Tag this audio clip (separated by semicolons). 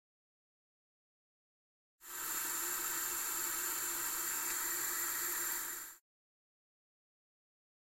CZ; Czech; Panska